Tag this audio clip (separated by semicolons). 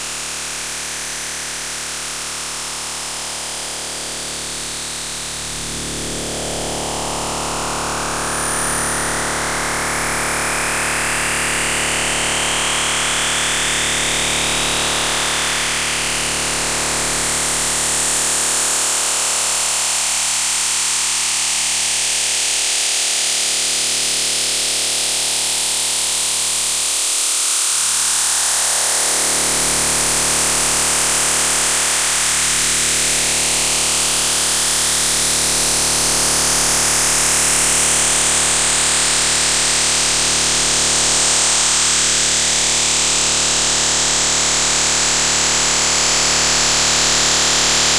painful; jackhammer; hammer; construction; noise; glitch; surgery; brain-surgery